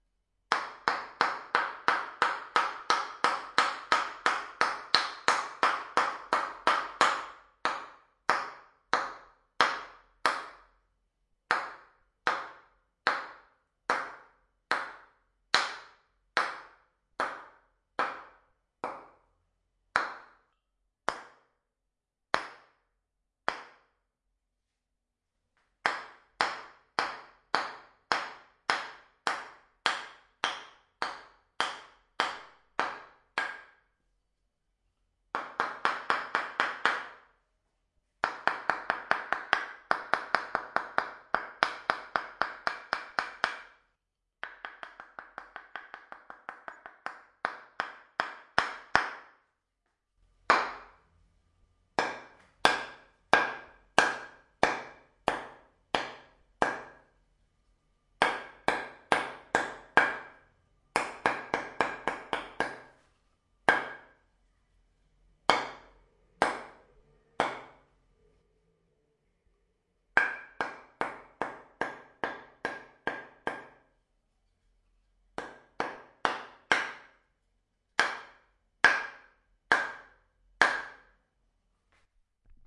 hammer on wood - Martelo em madeira
metal hammer beating on piece of wood.
martelo de metal batendo em pedaço de madeira.
hit, hammer, knock, wood, wooden, tap, impact